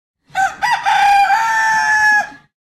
Rooster crows in a farm
This is a regular farm rooster doing his job. You might think he needs a throat softener. Maybe. He's just doing his best.
Recorded whith a Sennheiser cardioid dynamic mic onto a Sony PCM-D50.